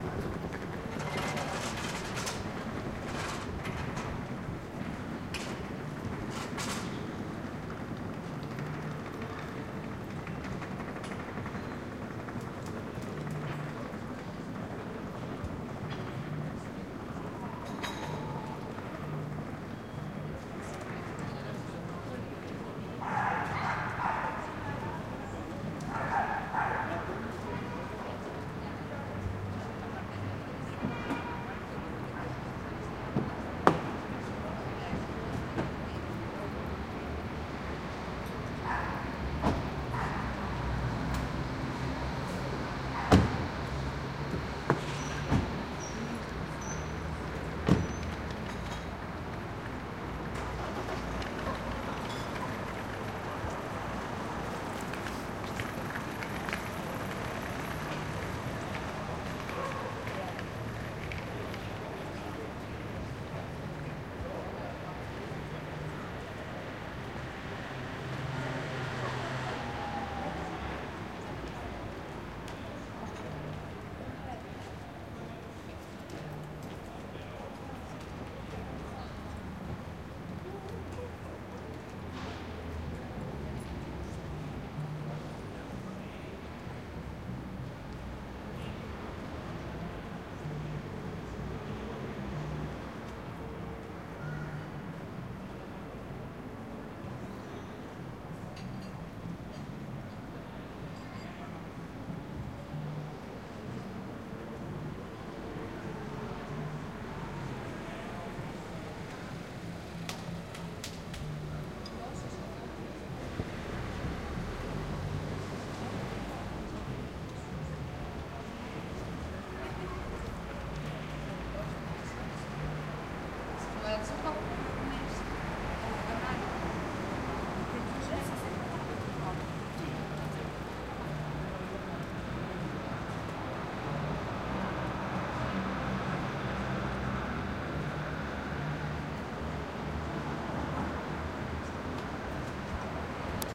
Sounds in Ramblas Barcelona. Recorded with Zoom H 2 on 2.3.2008
2008, barcelona, march, ramblas, zoomh2